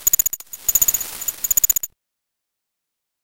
insects noise 003
A short electronic noise loosely based on insects.
ambience, ambient, chirp, electronic, evening, field, insect, morning, noise, pond, synthetic, water